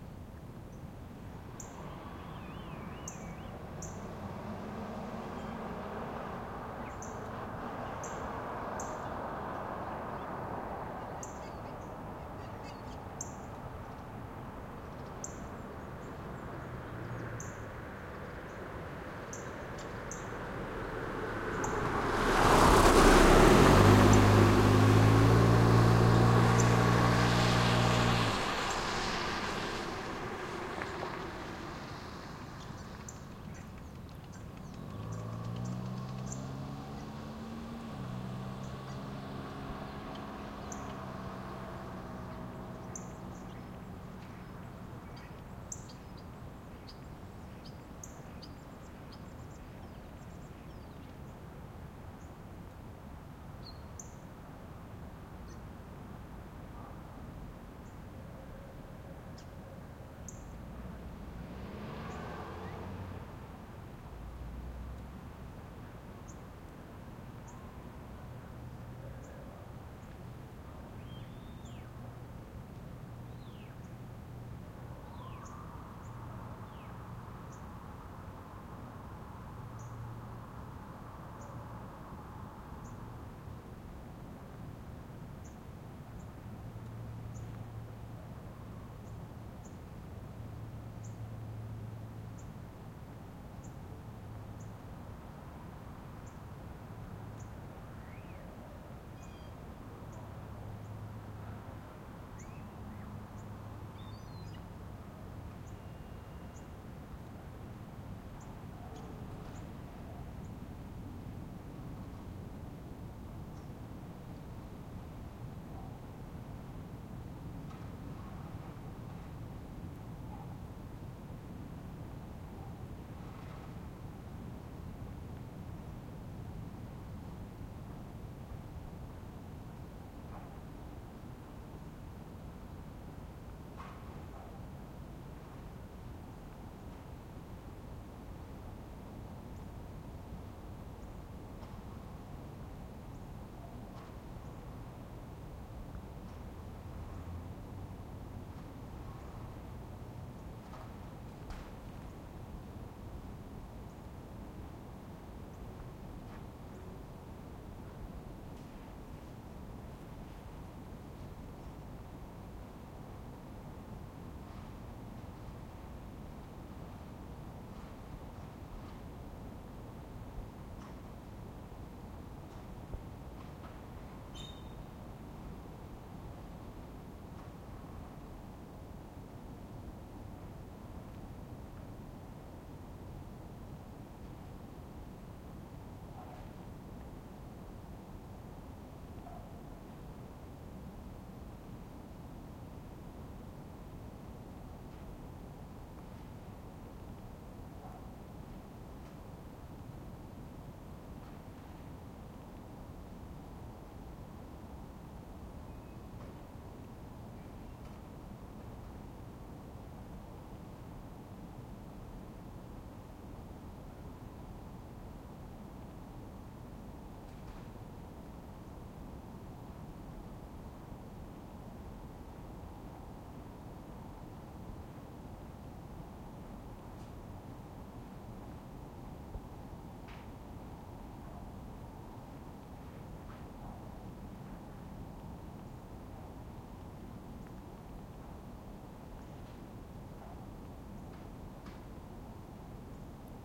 air tone suburbs early winter quiet distant bassy skyline +light neighbourhood sounds shovelling, passing car start Montreal, Canada
Montreal, Canada, quiet, air, distant, early, tone, skyline, suburbs, car, winter, pass